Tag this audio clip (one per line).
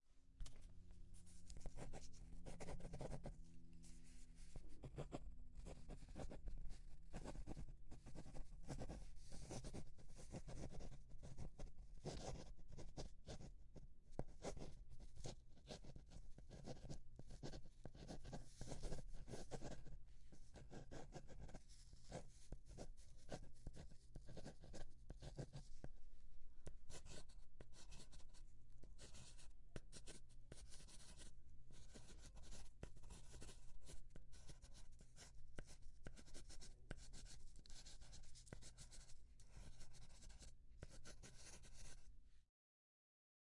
notes
typing
writing